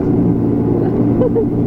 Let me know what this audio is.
A portable cassette recorder picks up some ambient noise on a bus ride. Someone laughs in the background such that it sounds a bit like a cuckoo clock.
cassette, collab-2, lofi, noisy